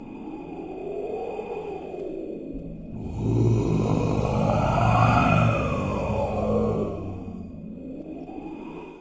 WIND - A breathy, wind-like voice sound is generated in real time by a formant synthesizer [1] driven by a physically-based glottal source [2], and controlled via planar mouse gesturing. The sound is played via a Indiana Line Th-230S loudspeaker mounted at the entrance of a 10-meter pipe (diameter 30 cm). At the exit the synthetic voice is echoed by a real human male voice. Sound is captured by a Beyerdynamic MCD-101 omnidirectional digital microphone placed in the middle of the tube. -------- references -------- [1] Provided with the Snack package by TMH-KTH, Stockholm. [2] C. Drioli, "A flow waveform matched low-dimensional glottal model based on physical knowledge", in The Journal of the Acoustical Society of America, vol. 117, n. 5, pp. 3184-3195, 2005.